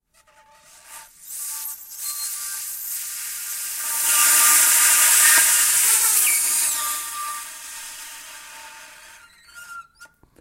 Recording of an improvised play with Macedonian Kaval